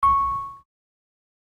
MUSIC BOX - 11 - Audio - F2
Some recordings of a small kikkerland music box set.
ting, music-box, note